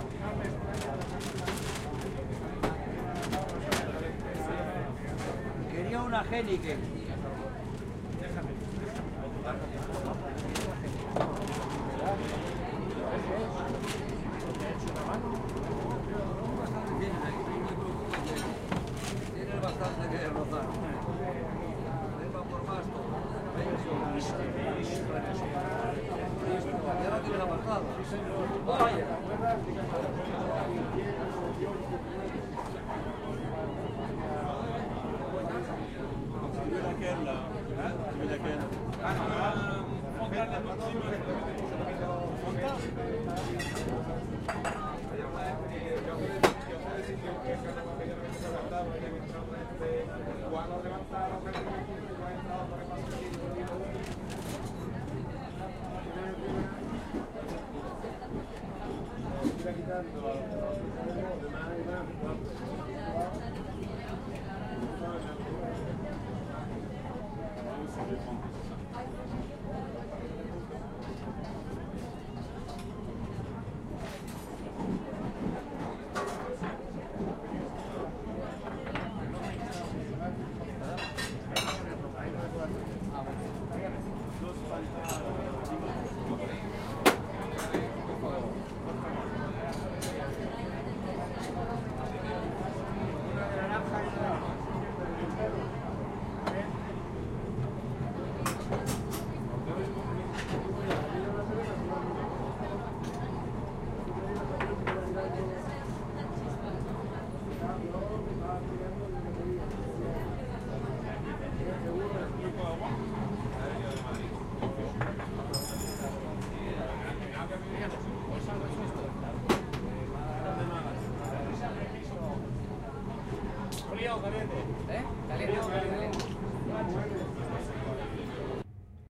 Bar on the night train from Paris to Madrid, waiters serving drinks, people chatting in Spanish
bar, chatting, people, train